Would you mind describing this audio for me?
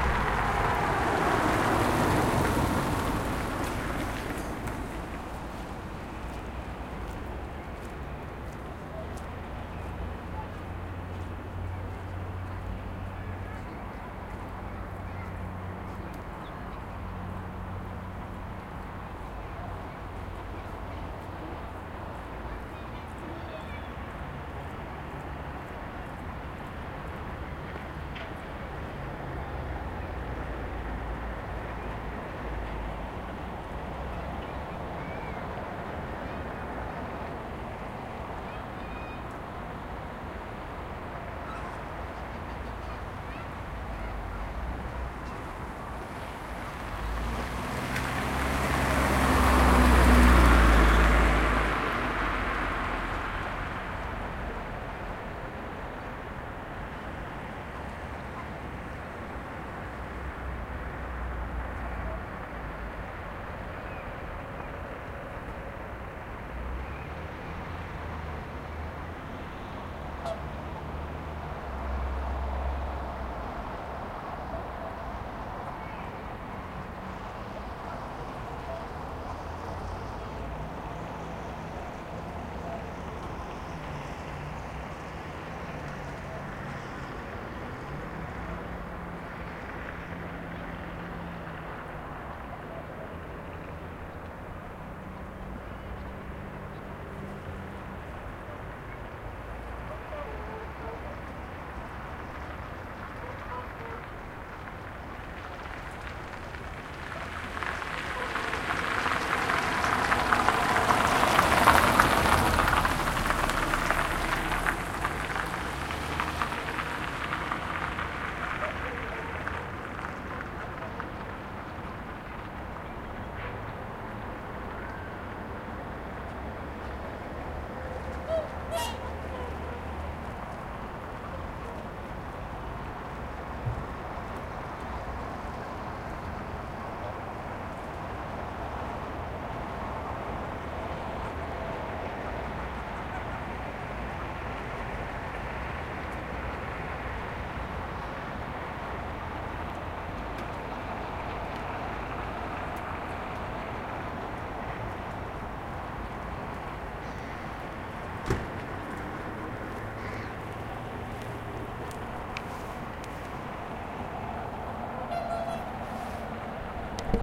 By a lake, cars, swans and ducks
Recorded near a lake in Hafnarfjörður, Iceland with a Zoom H4n.